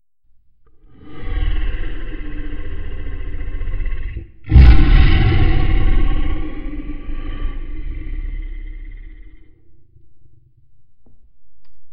a more advanced beast roar from the first.